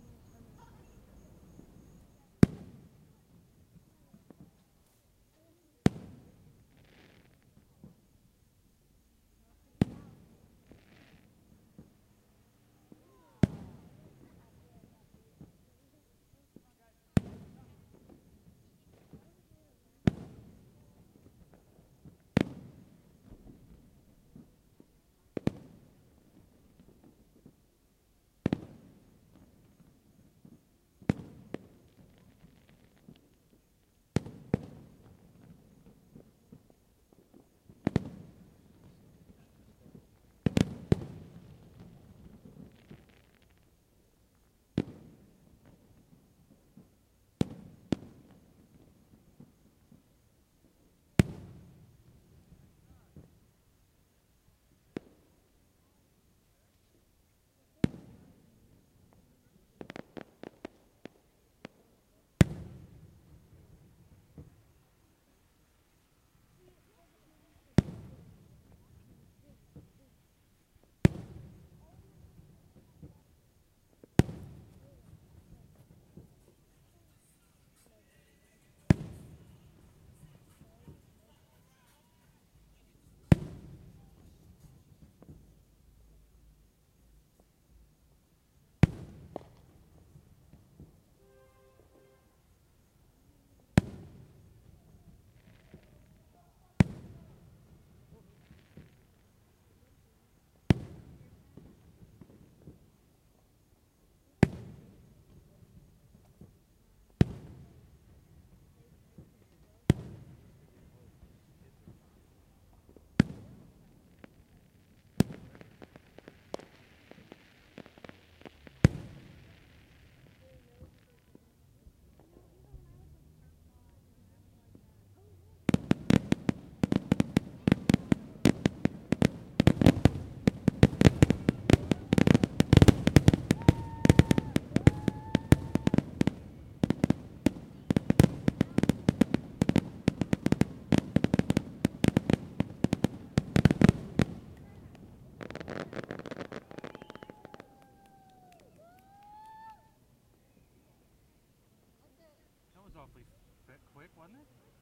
Fireworks recorded from an empty lot at ground level with laptop and USB microphone.